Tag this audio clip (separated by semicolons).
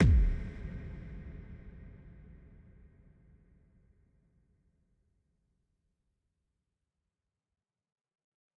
bassdrum; club; crisp; kick; reverb